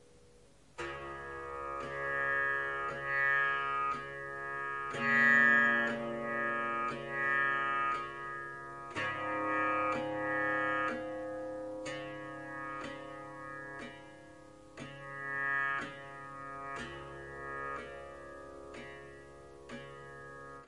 Tanpura Mournful Bass Line C sharp
Snippets from recordings of me playing the tanpura.
Tuned to C sharp, the notes from top to bottom are G sharp, A sharp, C sharp, Low C sharp.
In traditional Indian tuning the C sharp is the root note (first note in the scale) and referred to as Sa. The fifth note (G sharp in this scale) is referred to as Pa and the sixth note (A sharp) is Dha
The pack contains recordings of the more traditional Pa-sa-sa-sa type rythmns, as well as some experimenting with short bass lines, riffs and Slap Bass drones!
Before you say "A tanpura should not be played in such a way" please be comforted by the fact that this is not a traditional tanpura (and will never sound or be able to be played exactly like a traditional tanpura) It is part of the Swar Sangam, which combines the four drone strings of the tanpura with 15 harp strings. I am only playing the tanpura part in these recordings.
swar-sangam, ethnic